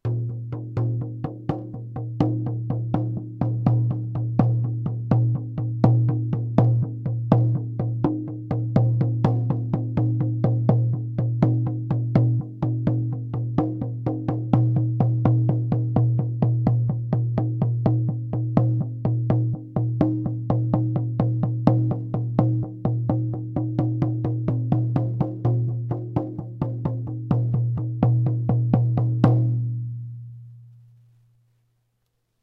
Shaman Hand Frame Drumming 01
Shaman Hand Frame Drumming
Studio Recording
Rode NT1000
AKG C1000s
Clock Audio C 009E-RF Boundary Microphone
Reaper DAW
frame, bodhran, drums, percussion, percs, percussive